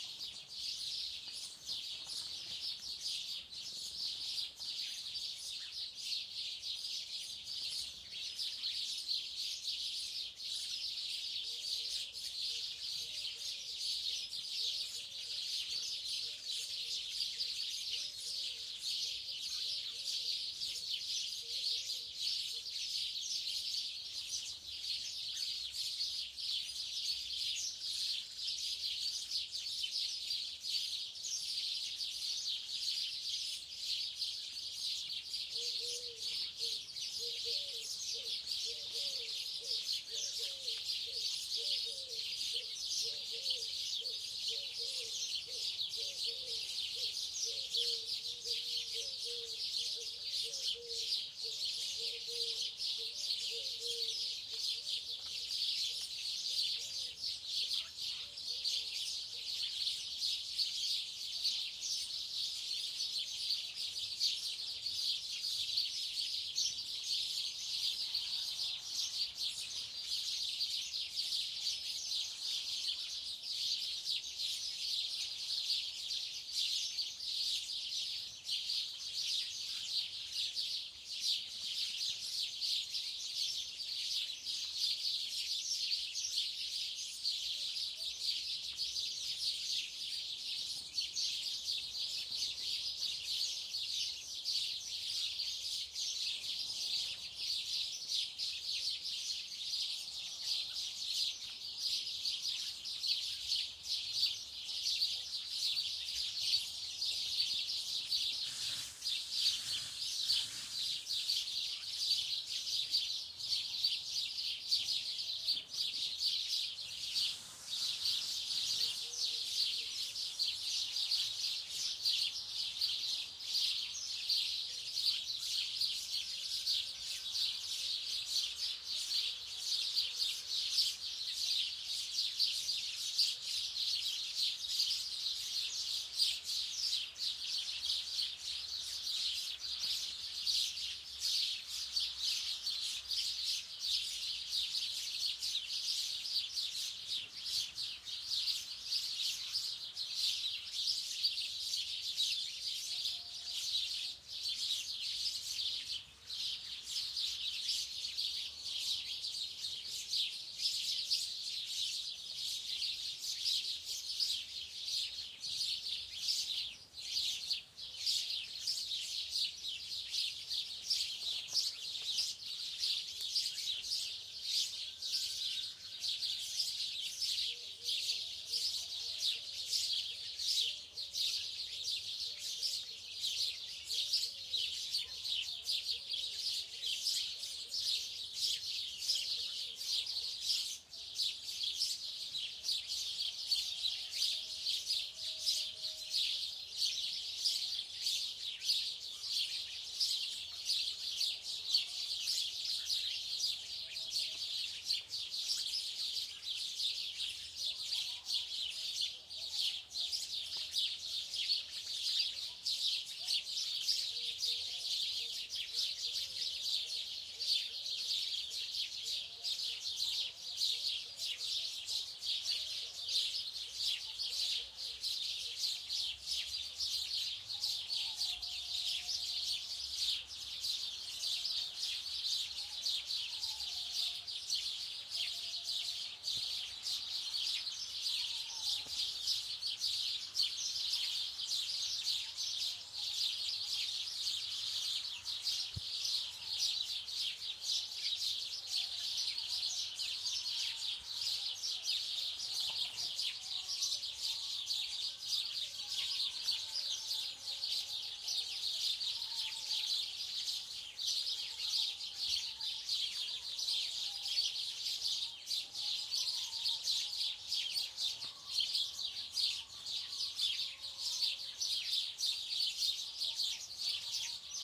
Birds singing at dawn, 6 o'clock, summer in a garden in a residential area, all of the birds in a three close of the microphone.
Recorded with a Soundfield SPS200 microphone in a Sound Devices 788T recorder, processed in Nuendo 10.3 with Harpex-X
5.1 (L, R, C, Sub, Ls, Rs)